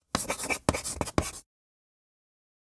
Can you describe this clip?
writing-chalk-short-02
01.24.17: Cut up samples of writing with chalk on a blackboard.
board, chalk, draw, text, writing